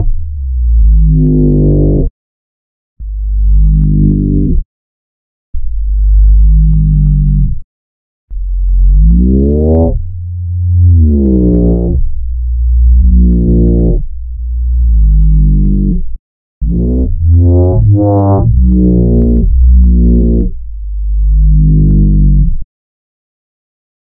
A deep dark bass slide.